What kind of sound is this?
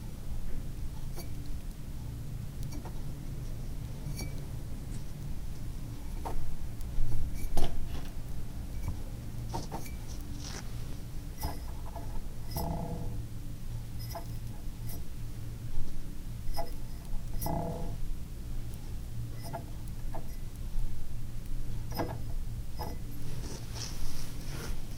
grabbing and letting go of a glass
Very soft sounds of grabbing and releasing a glass.